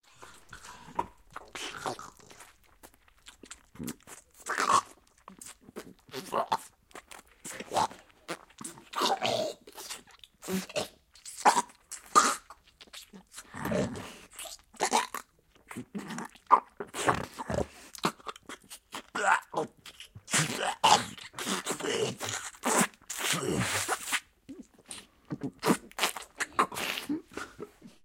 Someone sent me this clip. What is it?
2 Zombies eating
saliva, zombies, chewing, eating